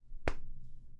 Manikin Hit
This audio represents when someone hits a manikin.
Hit, Manikin, Sound